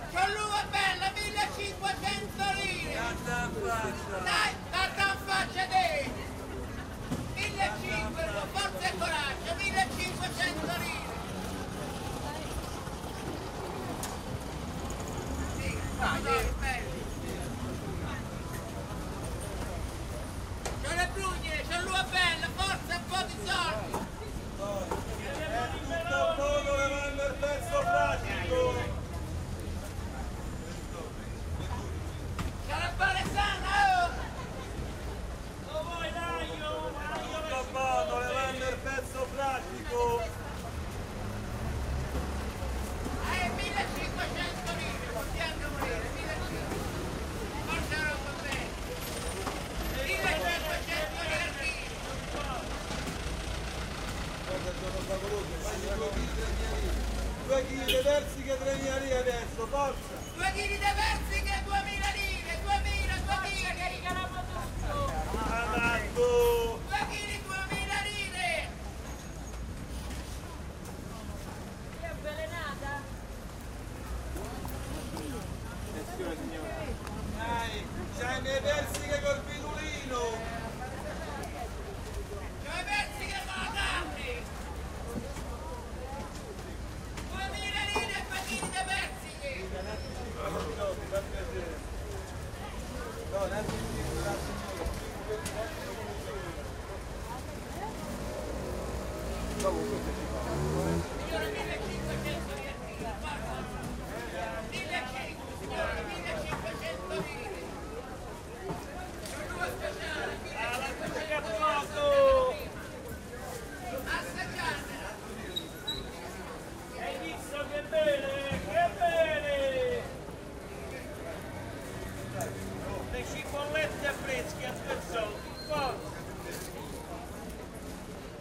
Italian market in October 1999 "campo de fiori